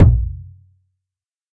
TomHigh PlasticSaladBowlPlusAboxDrum
This was for a dare, not expected to be useful (see Dare-48 in the forums). The recorded sound here was a big, thin, plastic salad bowl (the disposable kind you get from catered take-out) being hit by something. The mixed sound was a complex drum-like percussion sound sound I created in Analog Box 2, along with the impulse (resampled to 4x higher pitch) used in the kick drum sound also from Analog Box 2. This one is supposed to fill the role of the higher-pitched racked tom on a kit. A lot of editing was done in Cool Edit Pro. Recording was done with Zoom H4n.